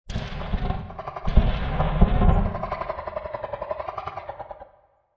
Blending walrus and a stork to depict a predator creature.
Sounds by: